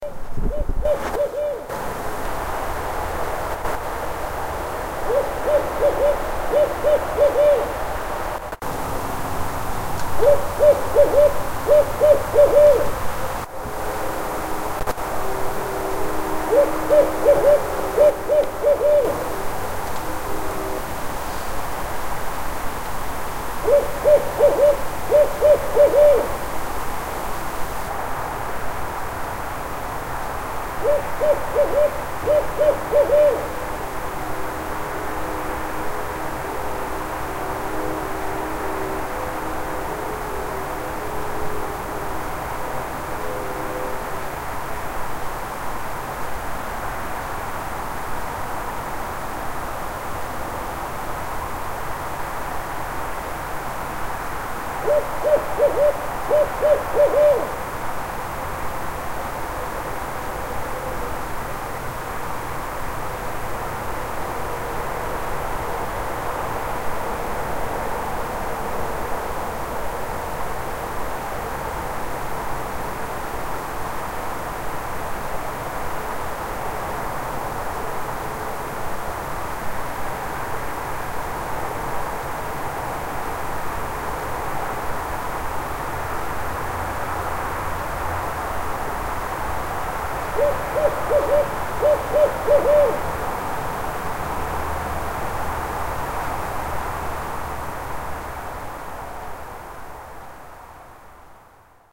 Atlanta Barred Owl - Backyard
A Barred Owl recorded in my backyard Atlanta GA 2/10/2009. Recorded with an M-Audio Micro-Track I and an Audio-Technica Stereo Condenser mic.